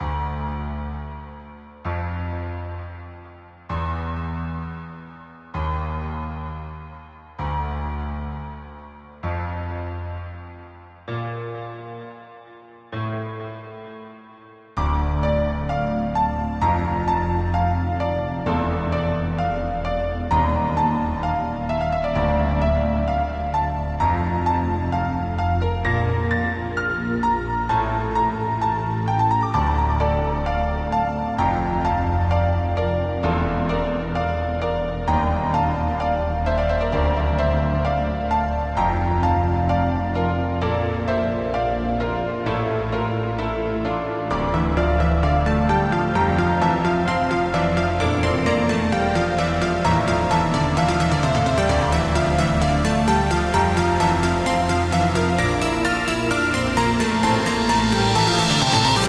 Eerie Piano Intro & Buildup
A little intro I composed in FL Studio with various tuned VST's.
65 BPM.
buildup, creepy, custom, eerie, intro, melodic, moody, mystical, piano, scary, sound, spooky